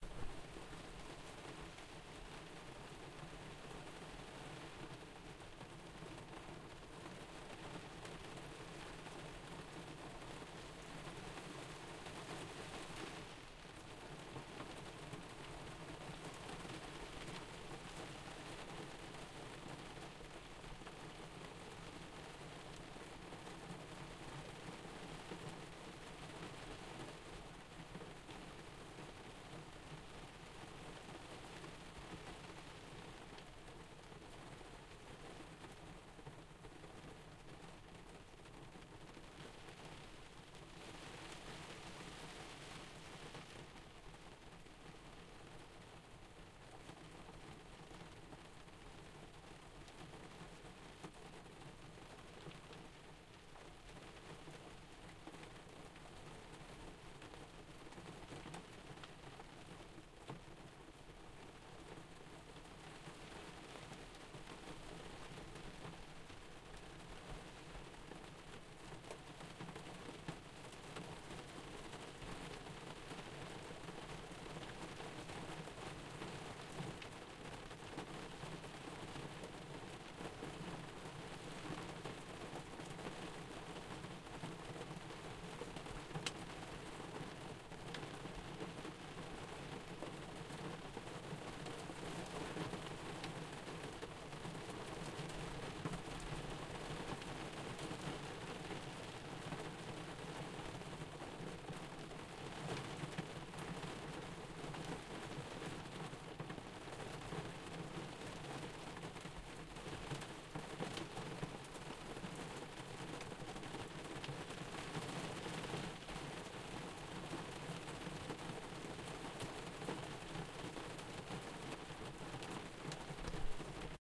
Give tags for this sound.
Rain-on-a-Window
Rain
Sound-of-Rain

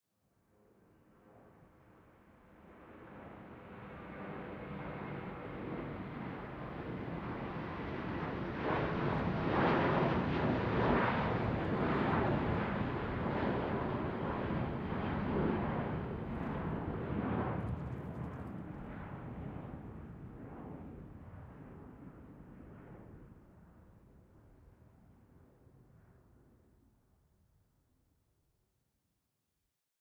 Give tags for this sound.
airplane
plane
traffic

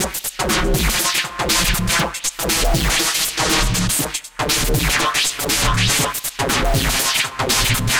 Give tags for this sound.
Beat
120-BPM
Electronica
Loop
Glitch
Drums
Distorted

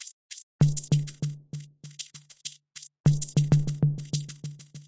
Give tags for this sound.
Beat
Drum
DrumLoop
Drums
Electric
Electro
Electronic
House
IDM
Loop
Machine
Retro
Trap
Vintage

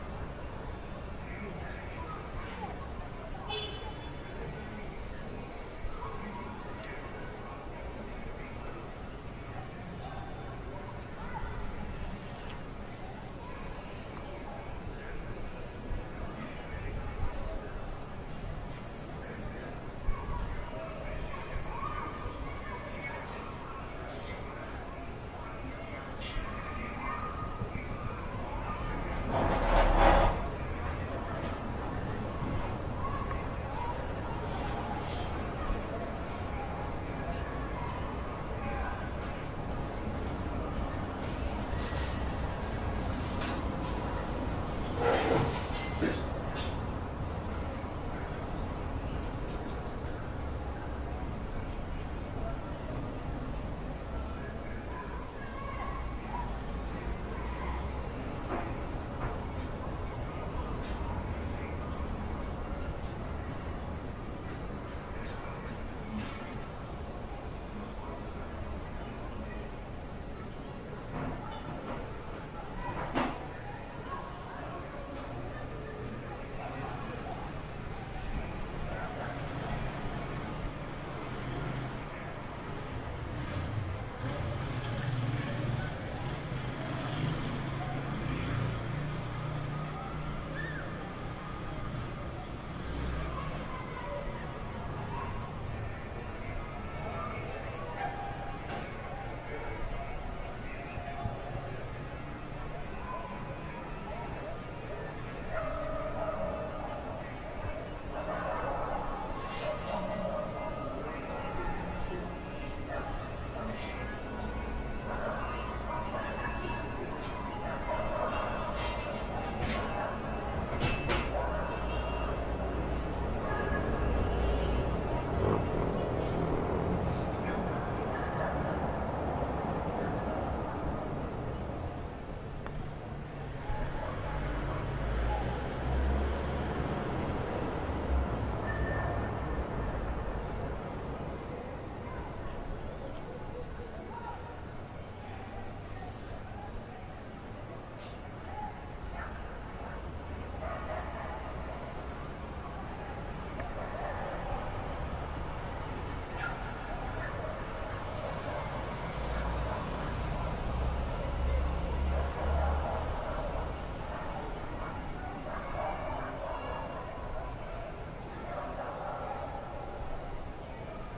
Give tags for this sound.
curiachito
ambience
varanda
natureza
terrace
night
nature
noite
curiaxito
field-recording

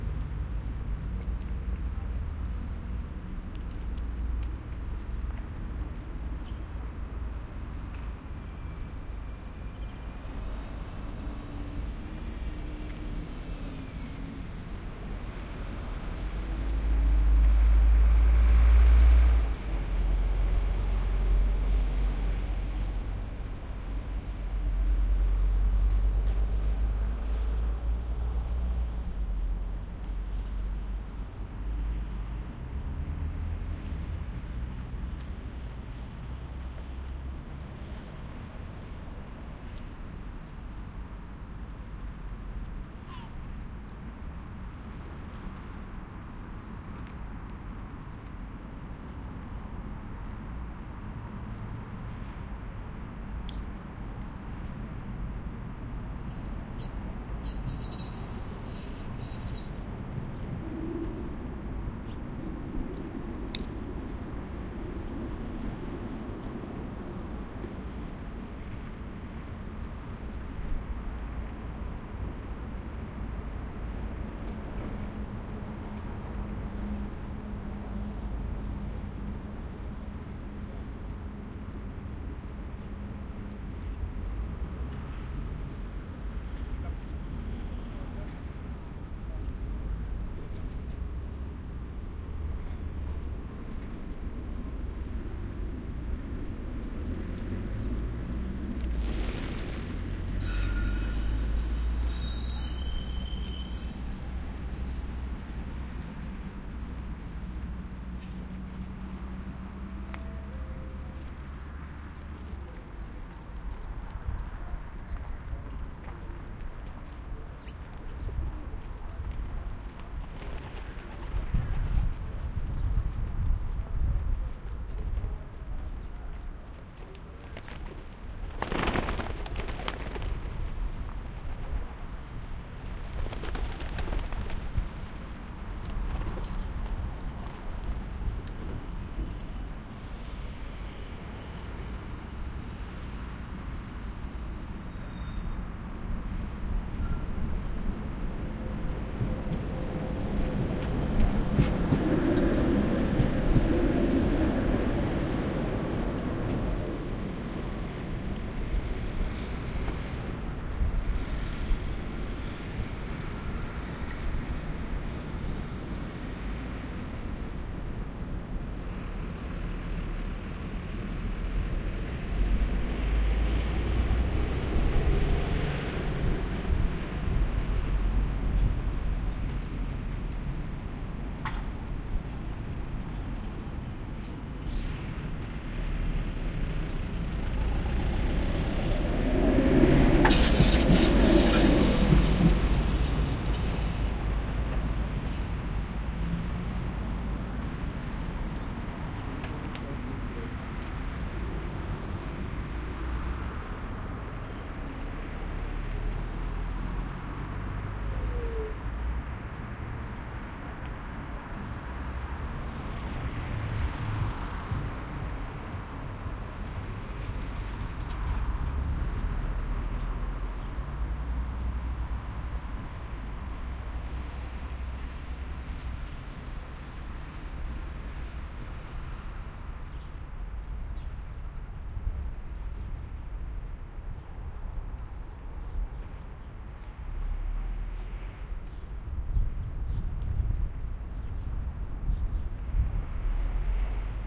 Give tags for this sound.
ambience
binaural
city
field-recording
headset
sennheiser-ambeo-vr
tram
urban

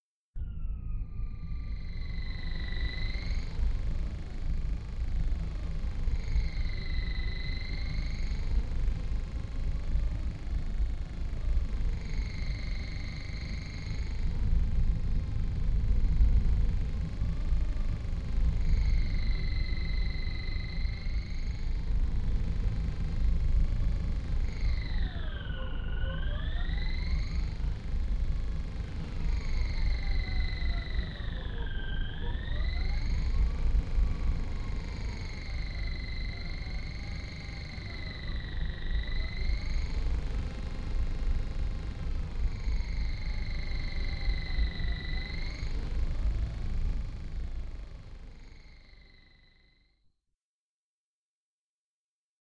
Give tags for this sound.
atmosphere
background
deep
electronic
energy
fx
machine
rumble
sci-fi
space

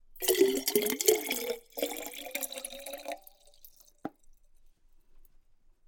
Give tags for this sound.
filling
fluid
pouring
splash
stream
water

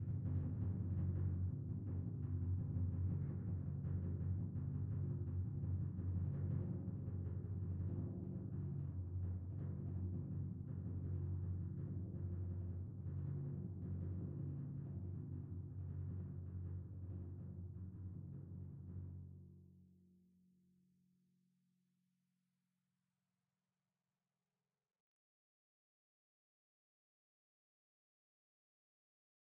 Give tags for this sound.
f2
percussion
midi-note-42
timpani
rolls
vsco-2
multisample

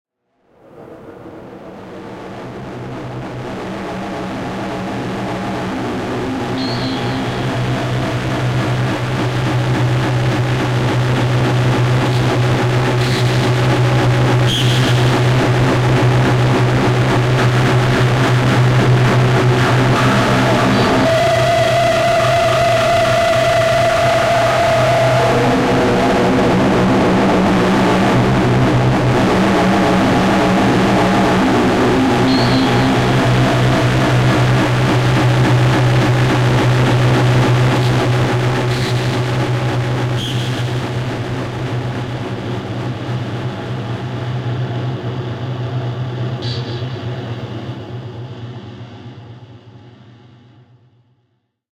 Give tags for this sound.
sad
I
well
am

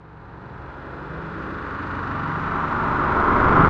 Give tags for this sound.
swell
sweep
up
buildup
riser
reverse
uplifter
build
fx

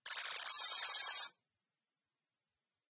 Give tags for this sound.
buzz
buzzer

